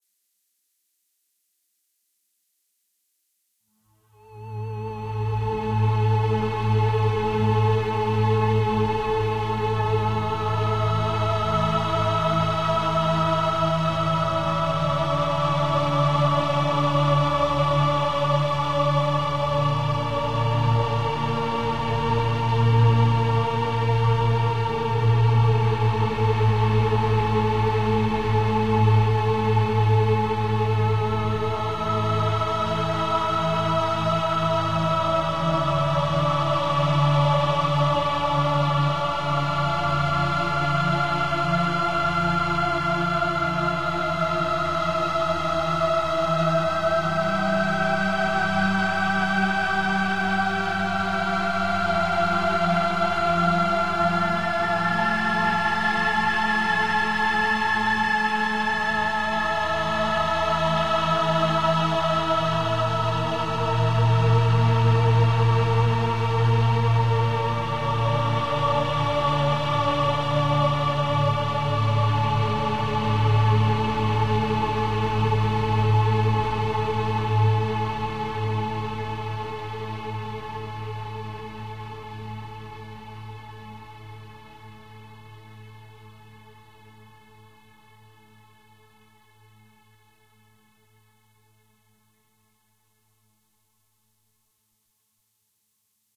voice melody
angels, choir, dead, evil, jupiter, mars, mekur, Melody, mysterious, planet, sadness, saturn, space, synth, uranus, venus, voice